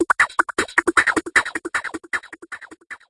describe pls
camel loop
percussion loop processed with camel phat
drums, hard, processed, percussion, rhythm